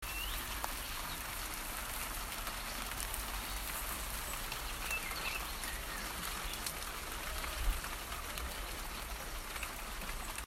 Garden rain
spring rain in garden, birds. distant cars
rain, birds, garden, spring